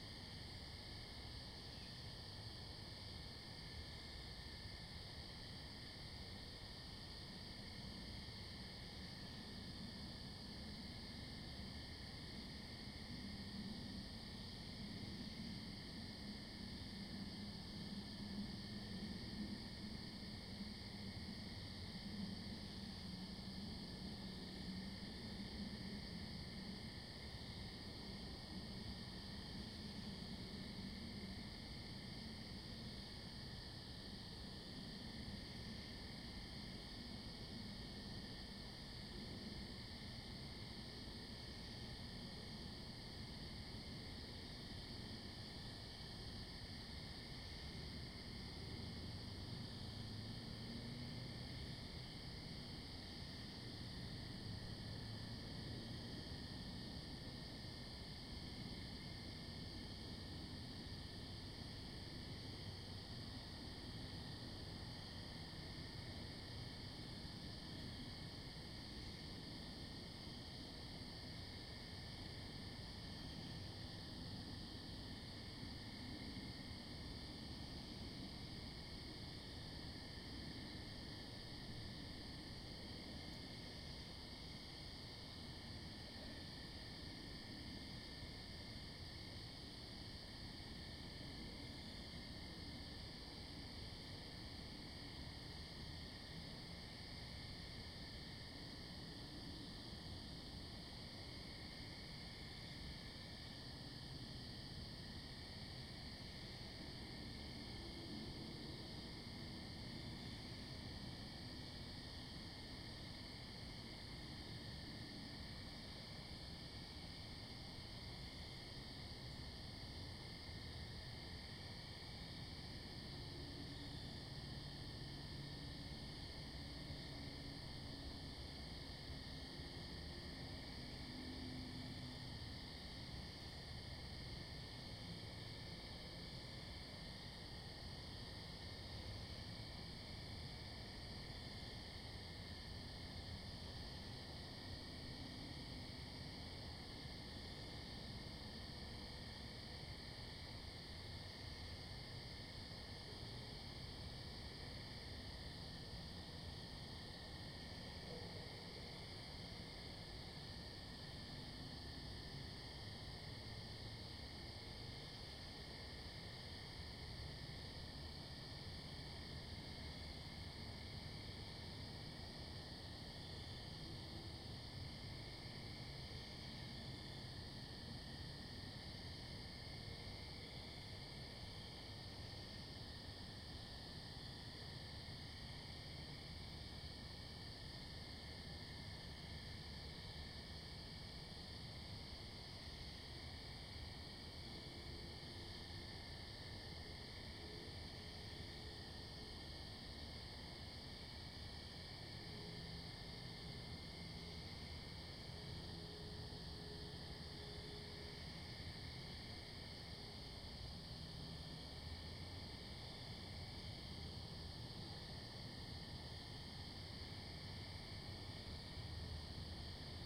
Night Crickets Back Porch
Recording of summer night sounds from my back porch, facing the woods. Recorded with an H2Zoom and edited (trimmed only) with Audacity. Sample taken from Charlotte, North Carolina USA.